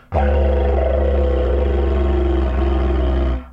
Didg Rolling R 3
Made with a Didgeridoo
aboriginal, australian, didgeridoo, indigenous, woodwind